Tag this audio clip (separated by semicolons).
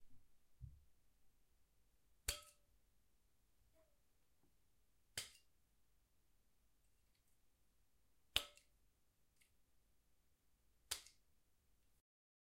gift; wine